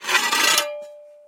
Recording of me dragging a garden spade against a concrete floor.
Mid and high frequency scrape of metal spade against concrete.
Recorded with a Zoom H4N Pro field recorder.
Corrective Eq performed.
This could be used for the action the sound suggests, or for an axe being dragged ominously against a stone floor.
This was originally used in a project. It was used for a character dragging an axe over a barn floor.
See project at